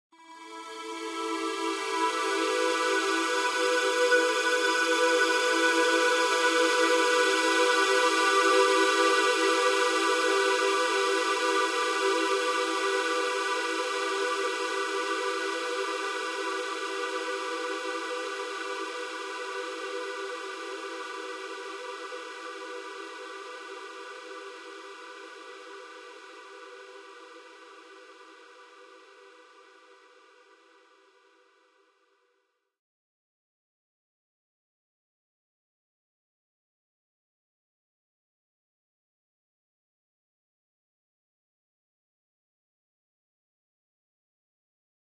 Synth with reverb artifacts
Soft Synth with a huge reverb tail made with Ubermod.
Reverb, Huge, Light, Valhalla, Synth, Ubermod, Gothic, Artifact